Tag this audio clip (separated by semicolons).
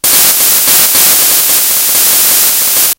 big; c64; chiptunes; drums; glitch; kitchen; little; lsdj; me; melody; my; nanoloop; sounds; table; today